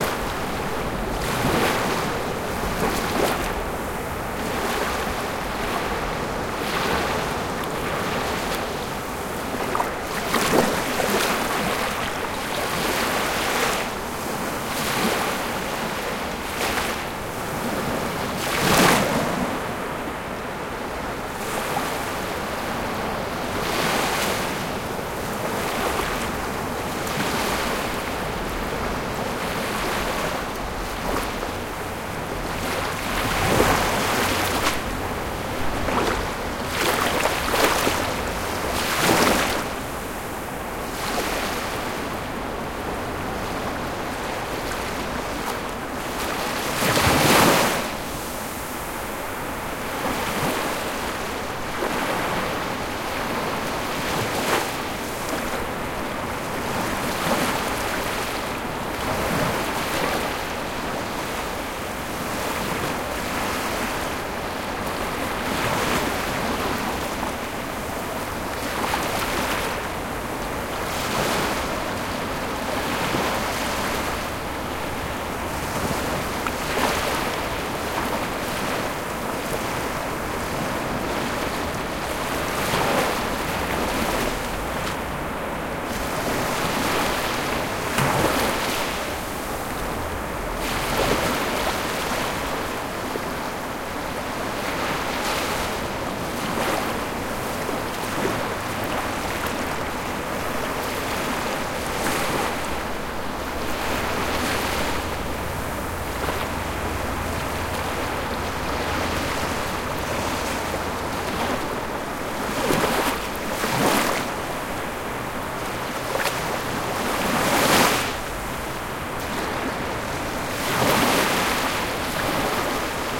Beach Waves - Close Distance
I recorded these beach waves within a few inches from the water at a beach in Florida with my Tascam DR-05.
asmr, beach, close, seashore, shore, water, waves